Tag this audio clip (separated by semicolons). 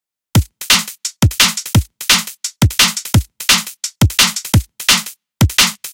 drum-loop; dnb; beat; loop; drums; drum